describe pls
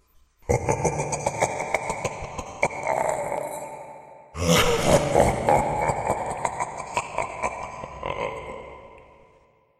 Evil Laughing
I recorded myself doing scary laughing using Pitch Bend, delay for echo effect and Noise Reduction. Recorded on Conexant Smart Audio and AT2020 USB mic, processed thru Audacity.
crazy, dark, evil, ghost, haunted, horror, Laughing, nightmare, scary, spooky